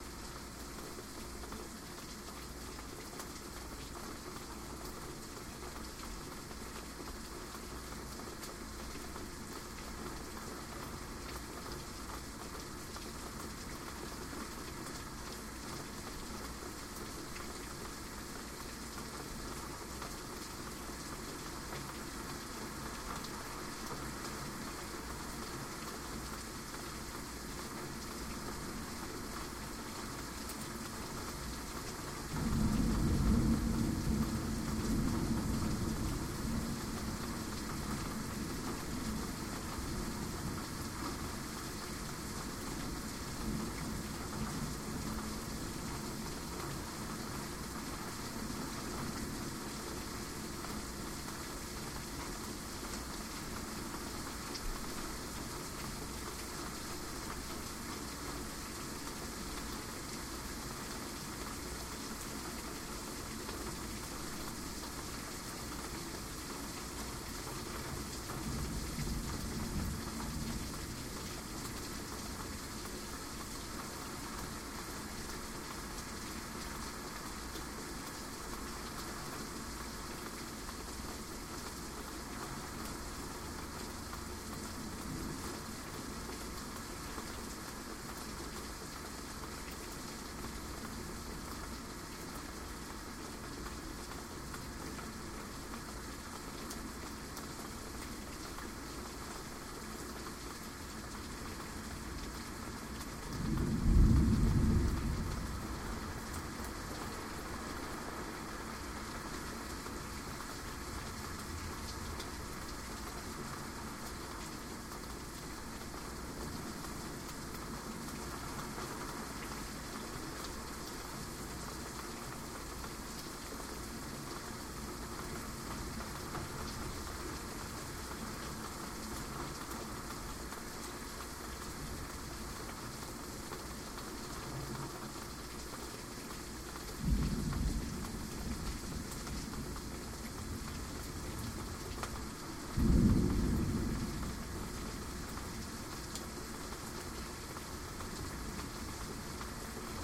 I recorded the sound of a thunderstorm from behind a screen door.